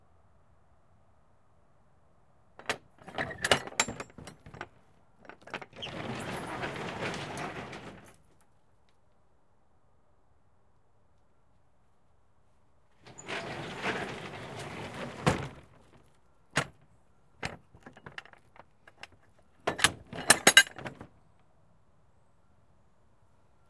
Transport truck roll up door open - close edited
Stereo recording of a roll up door on a transport truck trailer. Release latch, open door, close door and lock latch. Recorded with H4N on-board stereo mics
Delivery, TransportTruck, Shipping, Rollupdoor